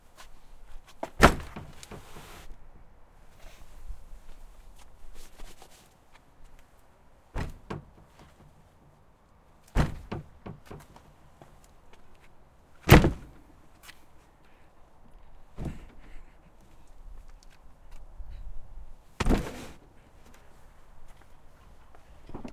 Body Hitting Wooden Door
A body hitting a wooden door
a, bang, body, collide, door, fight, hit, impact, into, knock, thump, walking, wood, wooden